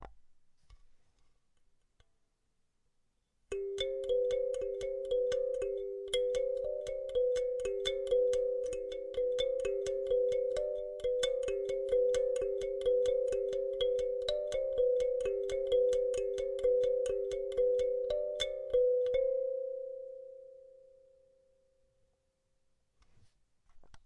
Kalimba melody 3
Kalimba original melody